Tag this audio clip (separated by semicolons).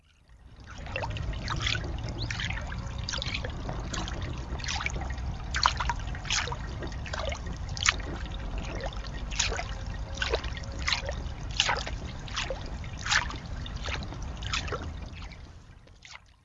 stick; ulp-cam; water